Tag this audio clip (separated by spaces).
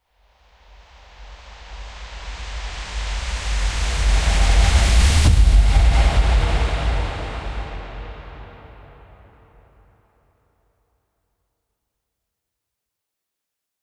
action awesome budget cinema cinematic deep design dope epic film free hit horror impact low low-budget mind-blowing movie orchestral raiser scary sound sub suspense swoosh thrilling trailer whoosh